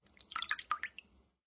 Short water dribble. Recorded using M-Audio MicroTrack 2496.
you can support me by sending me some money: